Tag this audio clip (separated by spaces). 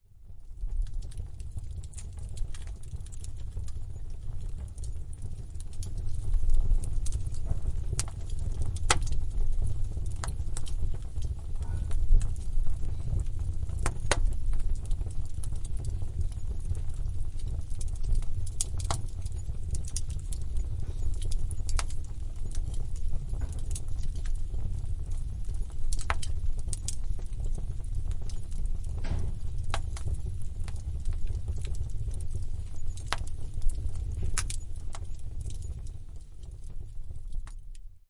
heat fireplace chimney burn logs log spark firewood hot flames crackle burning fire ember crackling combustion embers wood sparks flame